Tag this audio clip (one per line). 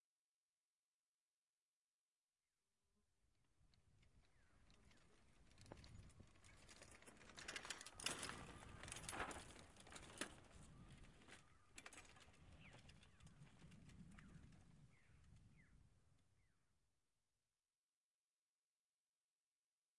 approach
bicycle
bike
chain
click
downhill
freewheel
jump
park
pedaling
ride
rider
street
terrestrial
wheel
whirr